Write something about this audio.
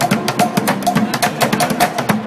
guys playing drums
Guys playing the drums on Fremont Street. Cool rhythm. Recorded with an iPhone. Edited it in Audacity so it could be looped.
cool, downtown, Vegas